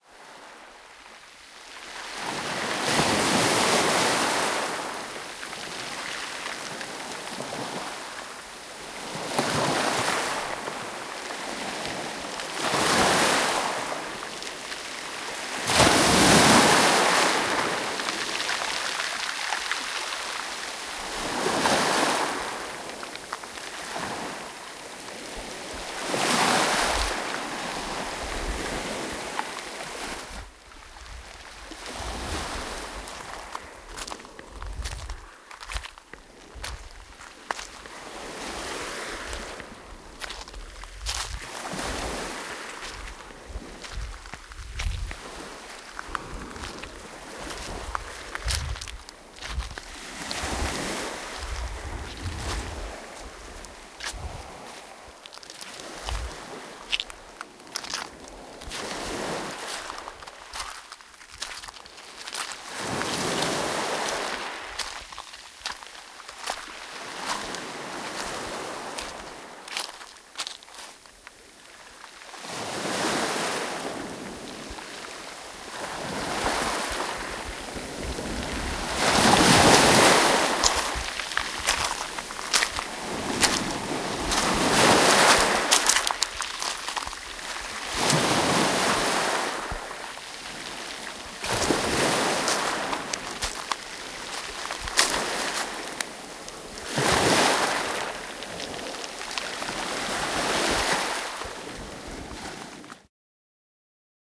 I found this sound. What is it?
Sea Waves Rocky Beach Walk
Clean, close XY stereo recording of sea waves crushing in a beach located in Preveza, Greece while walking. Footsteps on rocky sand are audible.
field-recording, footsteps, rocky-sand, sand, sea, sea-waves, walking, waves, waves-crushing